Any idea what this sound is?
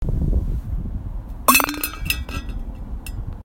Dropping Water Bottle
Dropping a ceramic water bottle onto the ground